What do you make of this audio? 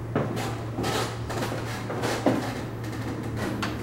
Step in Wood 2
Suspense, Orchestral, Thriller